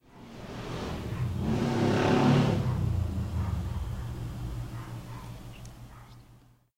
massive sounding motorcycle passing by the window